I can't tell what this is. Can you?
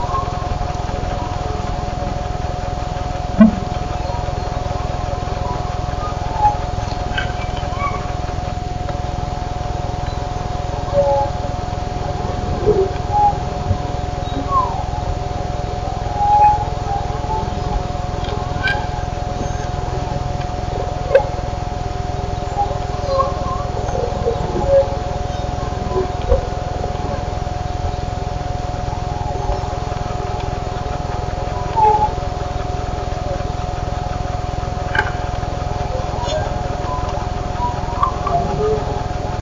electronic generated voices and ambience sounds
electronic algorithmic sonic objects
sonic-object, electronic-voices